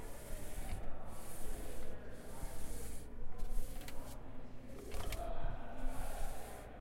Chair Slide Slow
A Chair being pushed in on carpet
Pull, Pushed, Dragged, pushedcarpet, Chair, Drag, Pulled